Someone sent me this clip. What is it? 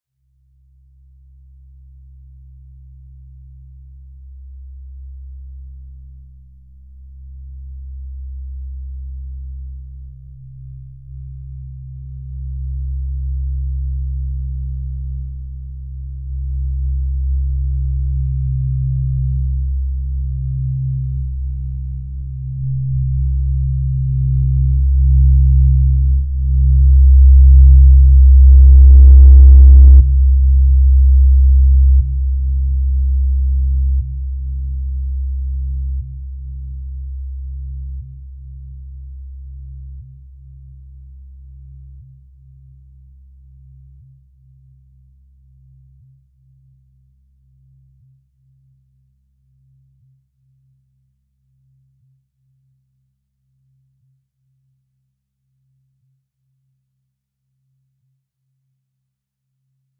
Bass sounds, heavily filtered, slight clipping in places! Be careful your ears/equipment!
Might be useful, who knows.